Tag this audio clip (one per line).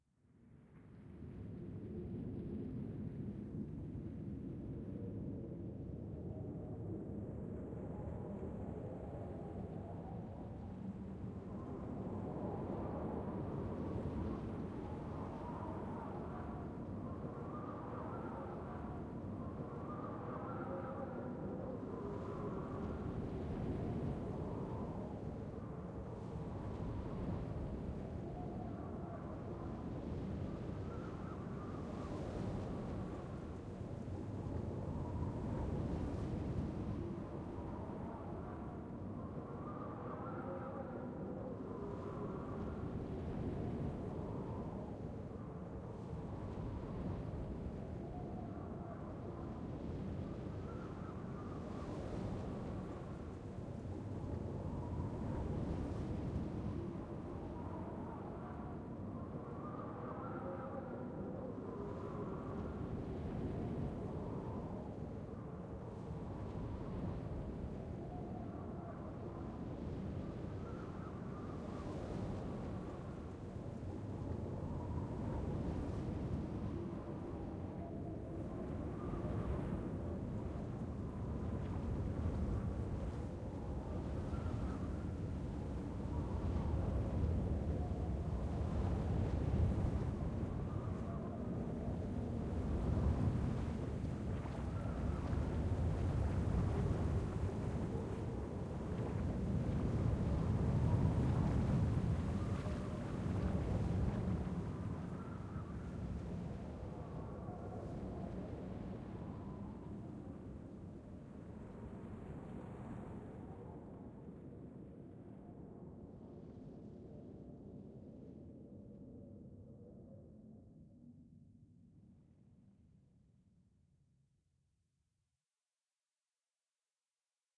wind
electro
feeling
dark
sci-fi
processed
strange
electronic
gives
fog
ambience
atmosphere
environment
synth